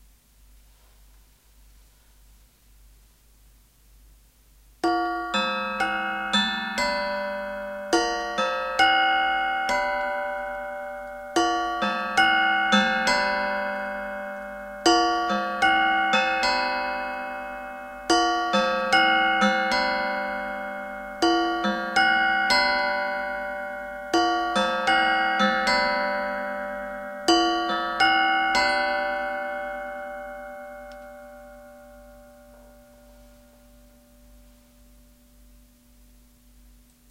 bells from small churches? Could be, but ain't. They are galvanized brackets for vertical mounts of wooden poles, while the other end is for be cured in concrete.